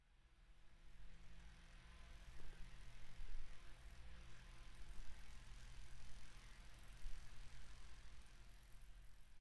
Background record inside a studio, very low sounds to prevent digital silence.
background, foley, silence